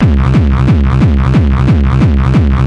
Darkcore kick loop
darkcore, industrial, kick, noise